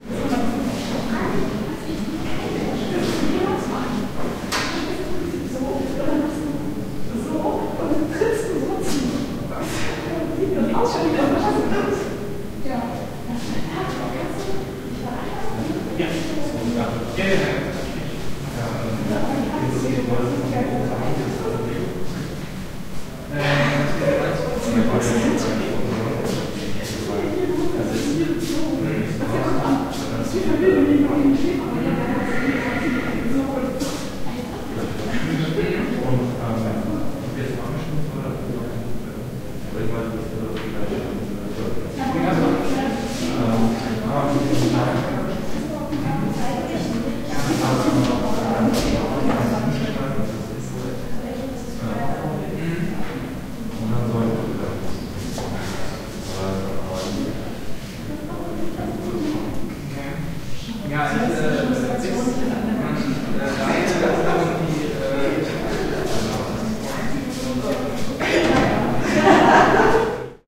University Hallway People
People talking inside a hallway at HTW Berlin.
man, words, voice, talking, vocal, male, people, voices, word, ambience, uni, speaking, speech, background-noise, boy, university, human, talk, woman, female, noise, walla, girl, german, text